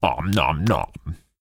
This is an omnomnom sound effect I made for an indie videogame about a hungry dinosaur.